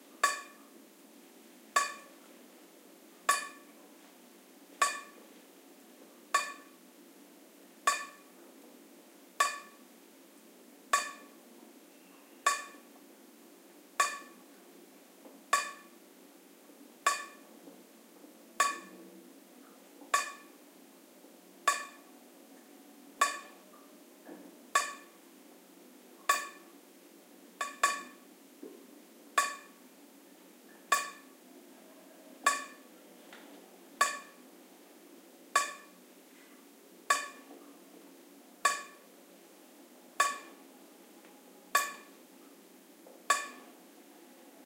20110924 dripping.stereo.05
dripping sound. AT BP4025, Shure FP24 preamp, PCM M10 recorder